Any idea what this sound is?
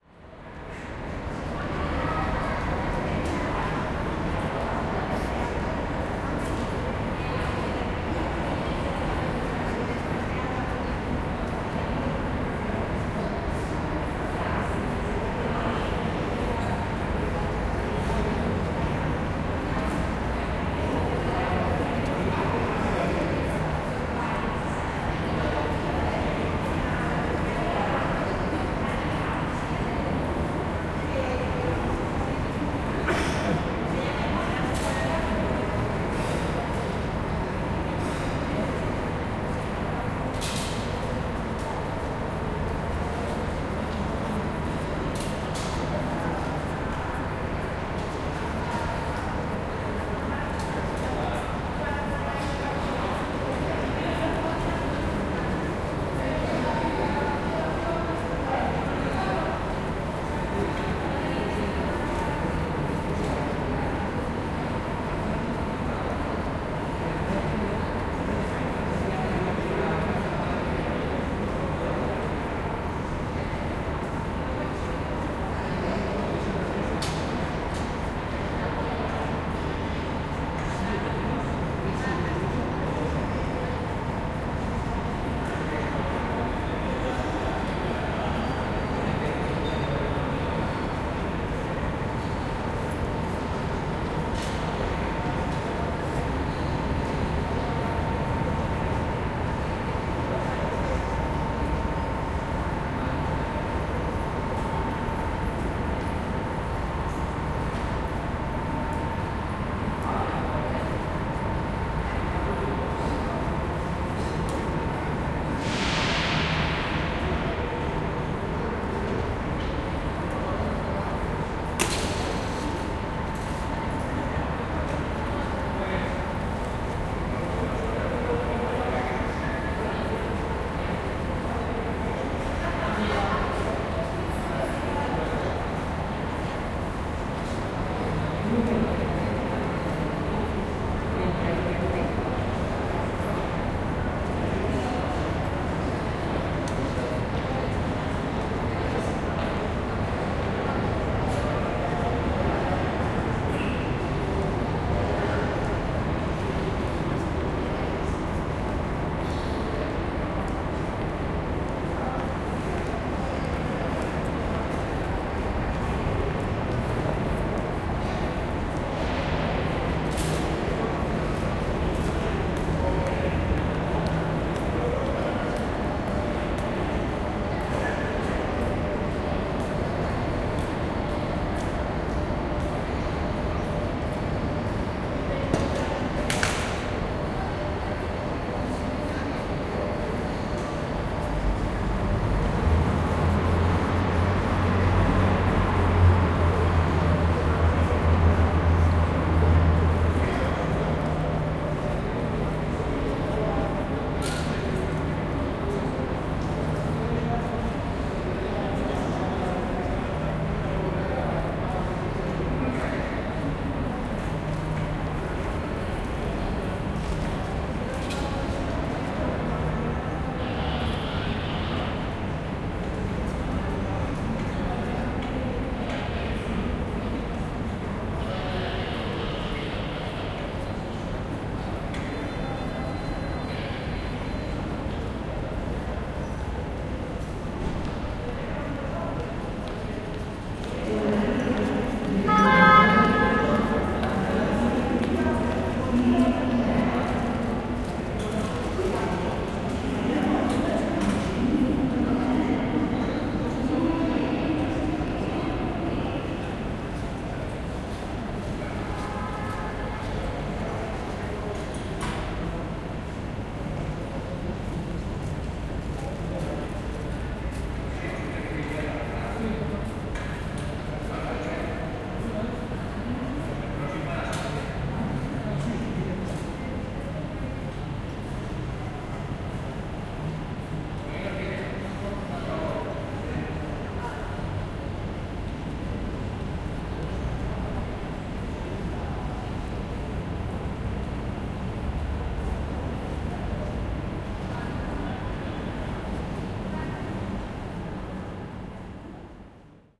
Train Station Atmosphere
Valencia's North Station atmosphere at noon.